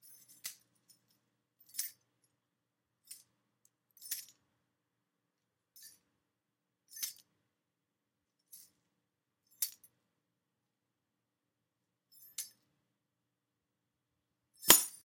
handling keys
Throwing and catching a keychain with five keys.
Keys, keychain, hands